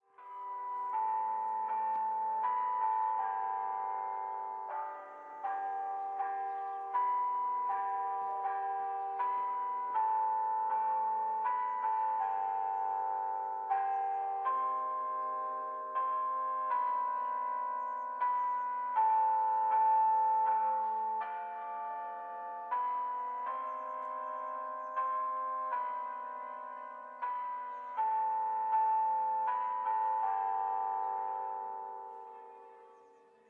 The bells of the Church ring far away in Ciudad de Ronda (Málaga, Spain). But hey! They play a pre-recorded melody! Recorded in a quiet Sunday morning with a Zoom H4N.
Las campanas de la iglesia suenan a lo lejos en Ciudad de Ronda (Málaga, España). ¡Pero tocan una melodía pregrabada! Grabado una tranquila mañana de domingo con una Zoom H4N.